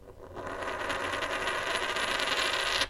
Spinning Coin
Sound of a coin spinning on a wooden surface. Recorded with a Tascam recorder.